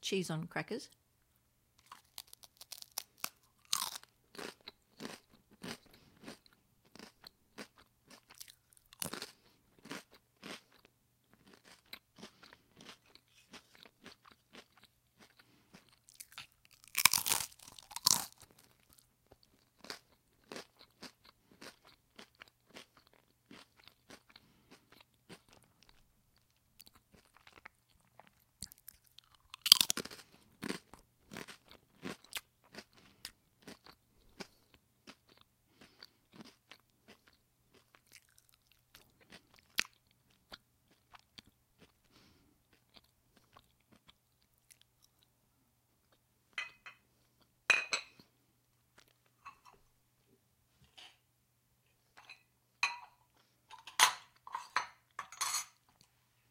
eating cheese and crackers carolyn
Cheese on rice crackers nice and crunchy - this is the raw noise recorded in mono and doubled over to stereo on audacity for you to play with.
You may use this for anything provided it is not graphic or porn! Comment here so I can check out your work! Have fun.
chewing, crunch, crunching, eating, food, munching